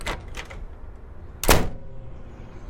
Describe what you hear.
Opening&ClosingCheapStormDoor
This is a mono recording of a cheap metal and plastic storm door
closing, recorded from the outside (so there is some traffic noise).
This was recorded on a Fostex FR-2LE with an AT897 mic.
storm, closing